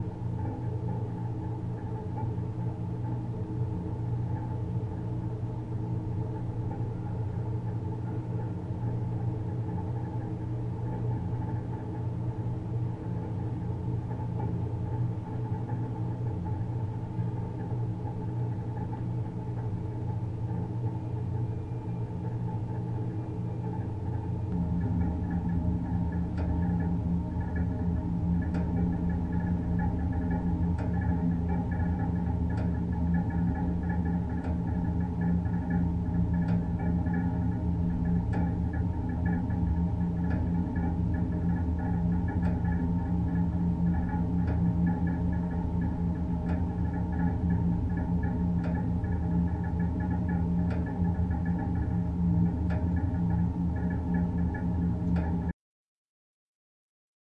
Spaceship Ambient
A spaceship sound that is moving thru the space, you can imagine the air filters pushing air thru the vents, the ships hull cracking as it moves thru the deep space.